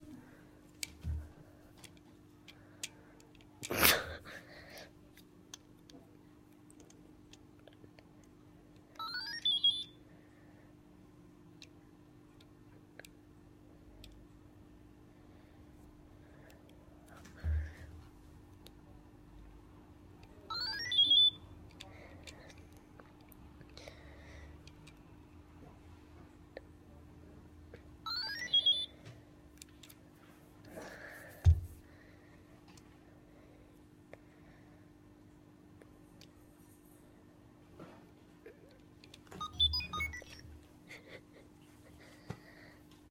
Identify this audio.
08 Radica 20Q Game
This is a recording of a Radica 20Q game. It was recorded at home using a Studio Projects C1.